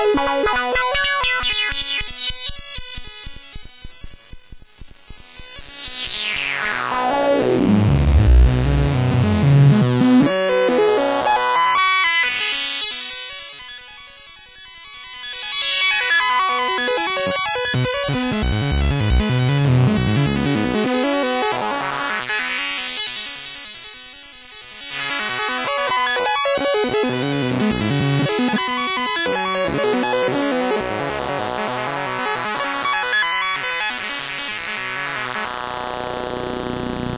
Created and formatted for use in the Make Noise Morphagene by Jake Pugh.
Korg MS-20 Mini sequenced duophonically with the Korg SQ-1 sequencer, high-pass filtered.